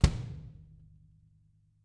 Bass Drum V4
My own drum recording samples. Recorded in a professional studio environment
Crash, Drum-kit